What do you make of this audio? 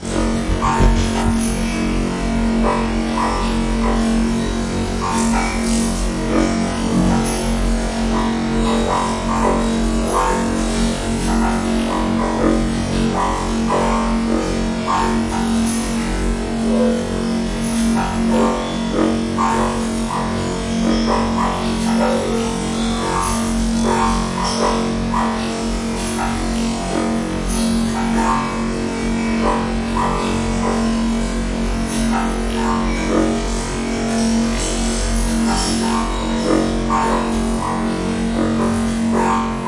A highly altered sound, using Audacity filters and effects.
echoing, metally